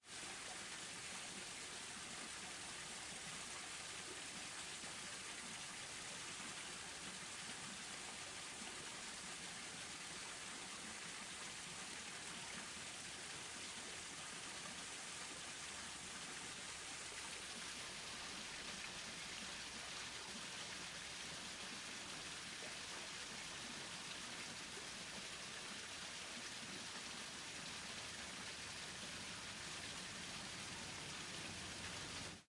various spots on small river